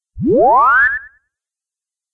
zoom up 1 (quicker delay)
A brief rising tone with added delay. This is the first version of this effect, with a fairly quick delay speed. A very "Astroboy"-esque effect.
delay, echo, science-fiction, sci-fi, space, warp, zoom